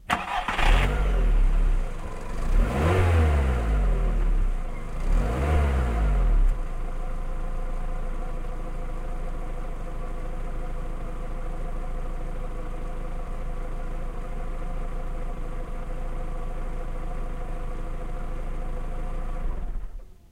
20050821.engine.VWGolf
a gasoline Volkswagen Golf engine starting, idling, stopping/motor de gasolina de un VW golf arrancando, en ralenti y parando